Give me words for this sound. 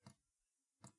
Water dripping from a tap